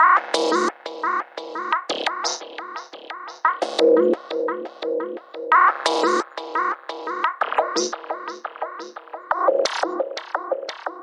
cloudcycle.nimbus-synthloop.1-87bpm
synth loop - 87 bpm
electronic, loop, synth, rhythmic